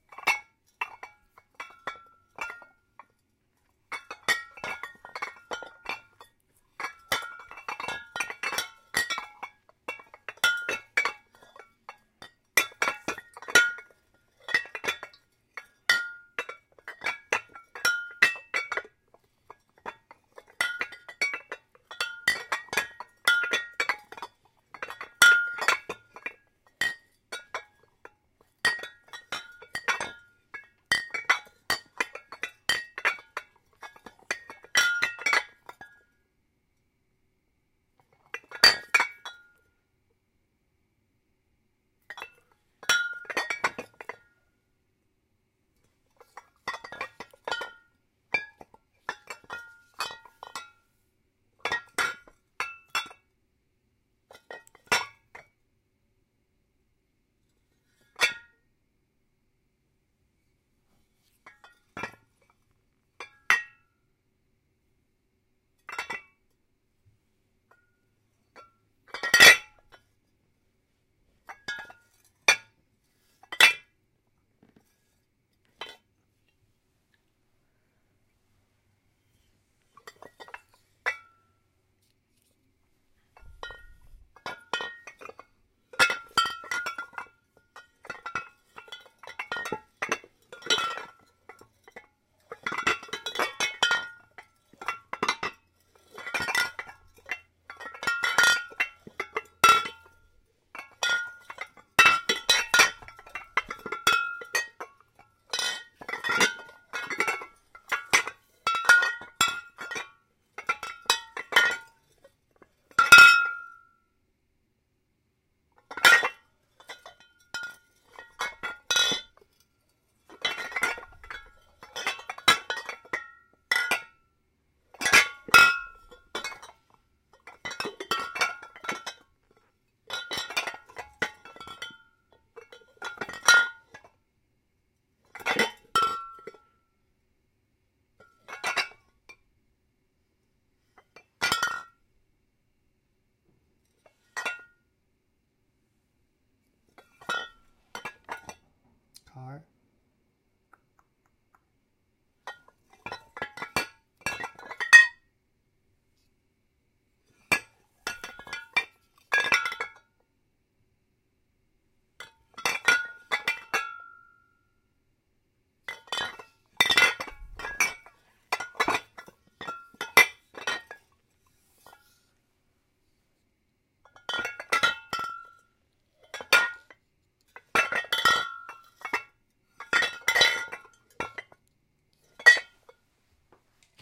bottles clinking
six bottles (wine, liquor, olive oil) of varying levels of fullness clinking together in a ceramic pot. great for milk bottles.
clinking, bottles